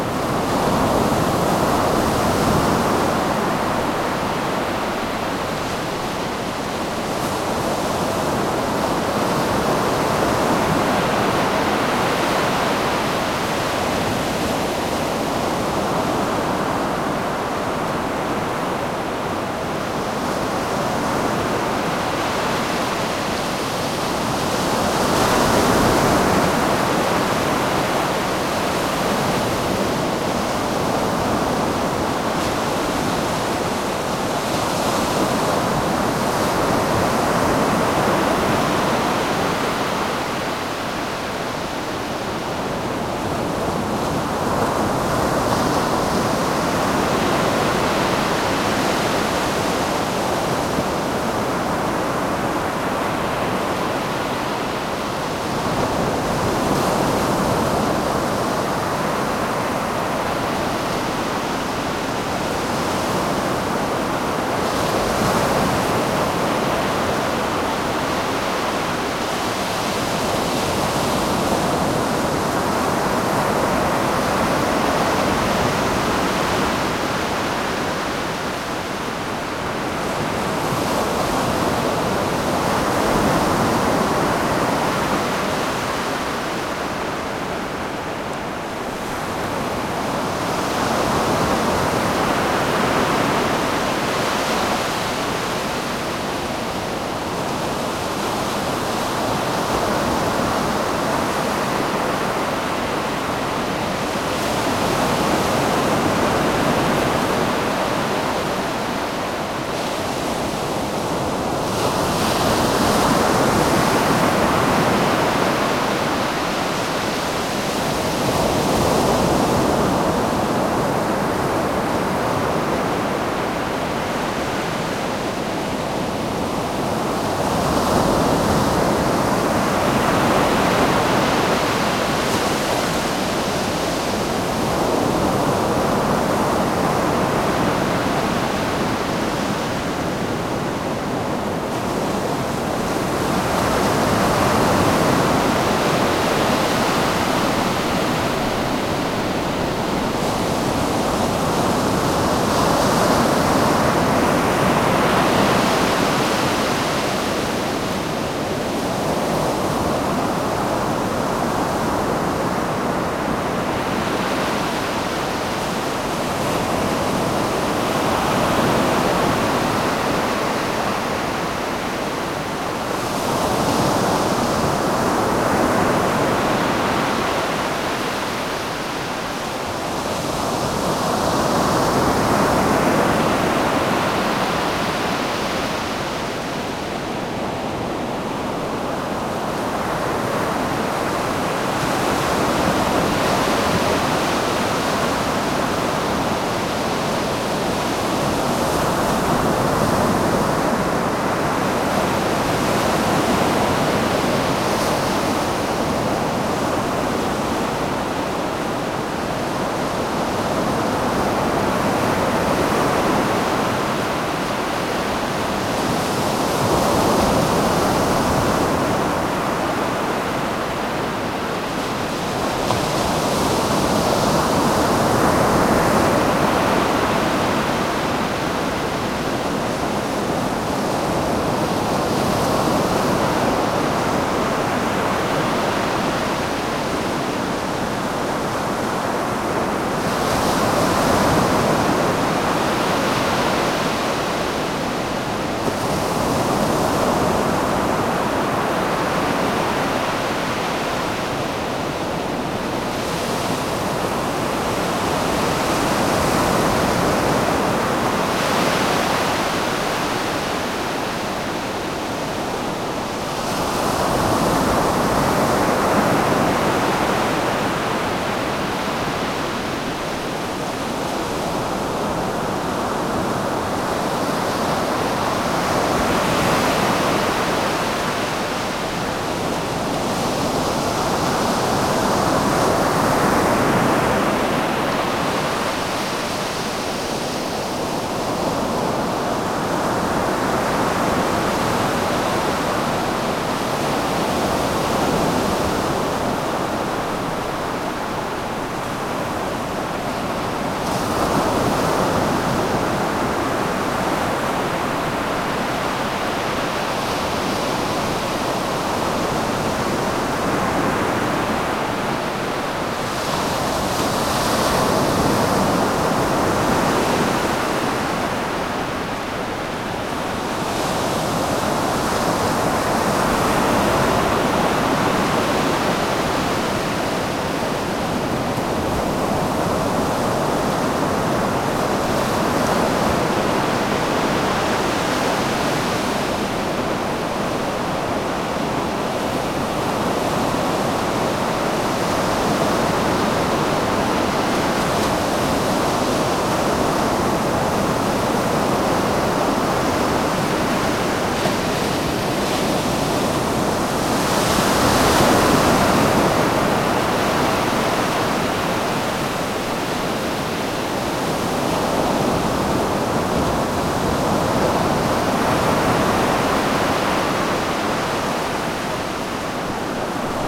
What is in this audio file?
Northsea at St Cyrus
The St Cyrus National Nature Reserve in Aberdeenshire / Scotland provides an important habitat for flowering plants and insects. It was a windy day in August 2010, when I did this recording, using a Sony PCM-D50 recorder.
beach, field-recording, flickr, northsea, ocean, scotland, stcyrus, surf, waves